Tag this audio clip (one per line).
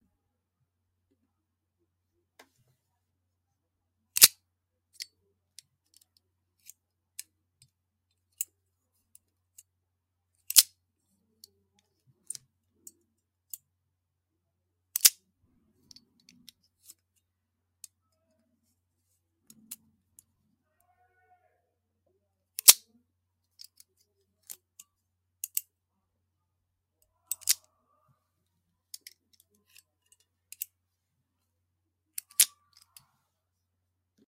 mechanism
metal
thunk
clink
lever
chunk
mechanical
gear